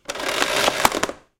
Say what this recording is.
Vacuum cleaner cable reel
Rolling back the power cable of my vacuum cleaner
back, cable, cleaner, fast, power, reel, roll, rolling, turning, vacuum